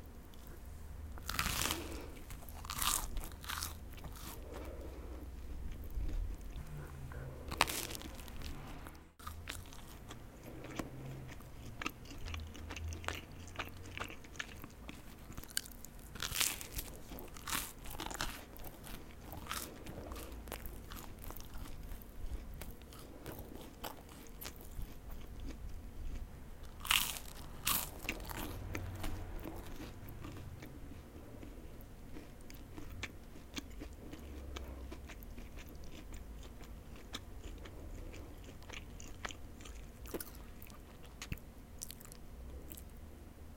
Eating some toast, great sound for a character eating anything crunchy
crunchy, crunch, eating, toast, eat